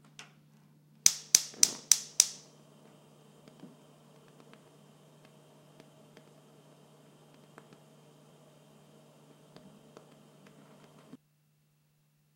Turning on a stove burner, one with a piezo-electric starter. Recorded with Sennheiser MKE 300 directional electret condenser mic on DV camcorder. No processing, clicks hit 0.0 dB but flutter is of flame is very quiet.
click, flutter, household, noise